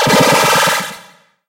POWERUP!
This sound can for example be used in games, for example when the player picks up a reward or a powerup - you name it!
If you enjoyed the sound, please STAR, COMMENT, SPREAD THE WORD!🗣 It really helps!
/MATRIXXX
pick-up, extra, heal, up, xtra, adventure, powerup, game, extra-life, platformer, rpg, pickup, pick, xtra-life, 1up, Power